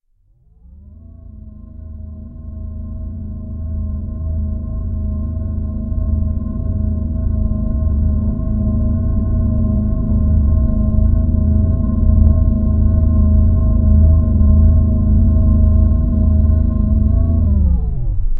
checking the nuclear reactor
nuclear, tone, sample, low, deep